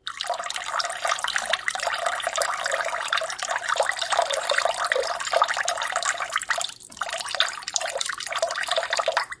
faucet water / torneira aberta
torneira, water, agua, sink, aberta, running, drain, faucet